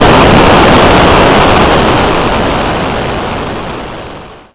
large destruction01
army, artillery, bomb, boom, destruction, explosion, explosive, game, games, military, video, war